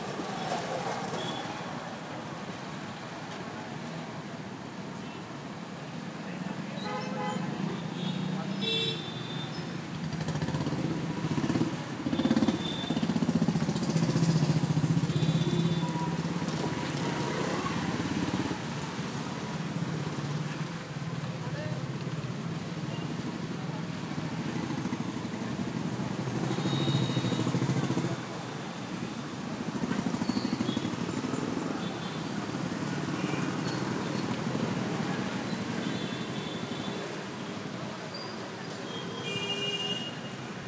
City Traffic (Night, 10 PM) near a crossover at Pune, India.